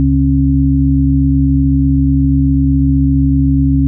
om8cord3
136,1 Hz, 272,2 Hz and 68,05 Hz chord
You sound amazing.
1, 136, frequency, Hz, om, sinus